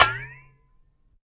Recorded the pitchy sound the top of a pot made when being submerged and taken out of water. Recorded on my Zoom H1 with no processing.